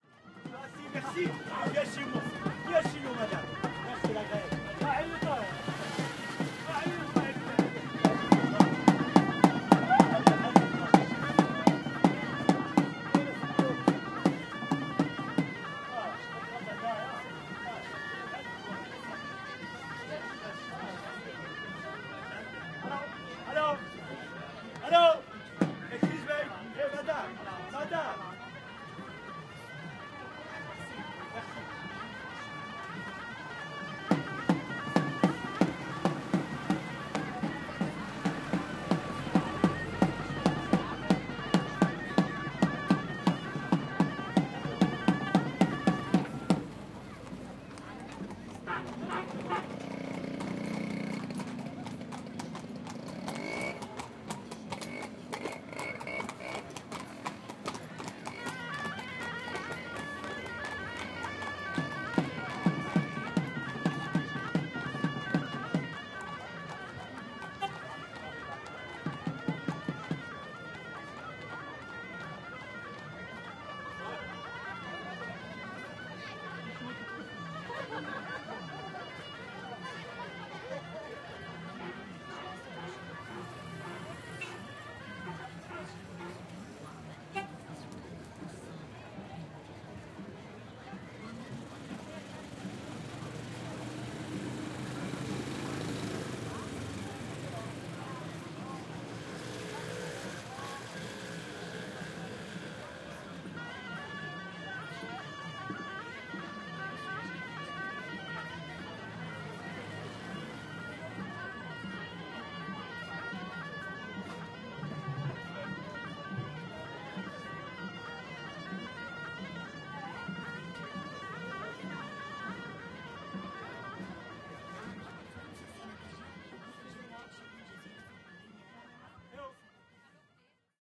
Jamaa el Fna Afternoon Atmosphere 2
Afternoon atmosphere at on the famous place Jamaa el Fna in Marrakech Marokko.
You can hear people talking or making business, snake charmer with flutes and cobra snakes and mopeds drive across the square.
Marokko, Atmosphere, Travel, Fna, Jamaa, Public, el, Marrakech